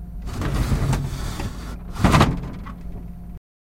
A drawer slamming.